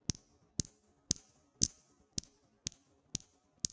Raised pitch clicking
Raised, snapping, pitch, finger